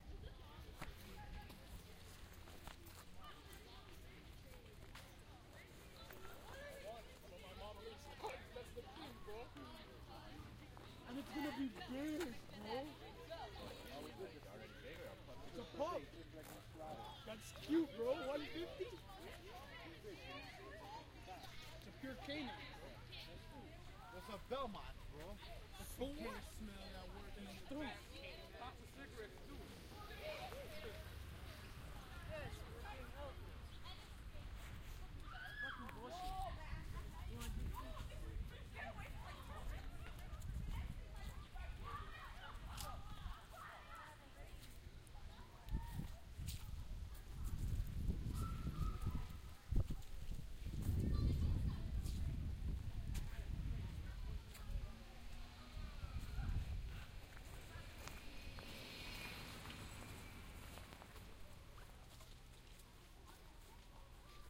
more windy schoolkids
Walking by a high school as it lets out for lunch. A bit of wind in the background.